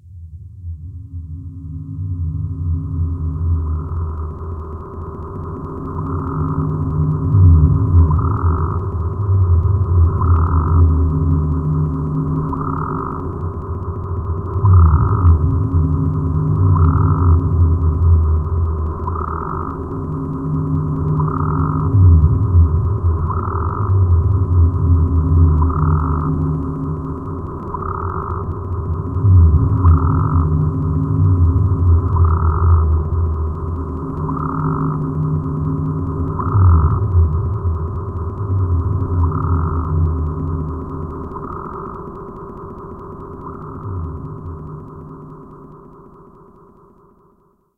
Flowers Forest At Night 1
This one is complex. The looped pad-like sound was a tiny clip of sound from my radio, which was processed heavily. There's a really low frequency sound you can't really hear, that was just a sine wave with wah, phase, and a lot of other filtering stuff. Used a harmonic noise generating thing for Audacity for some sweeping sounds. The frog-like sound that's looped was synthesized entirely. Another addition to my popular "detailed soundscapes" collection ;D I liked these two samples a lot, don't be shy, share your opinion!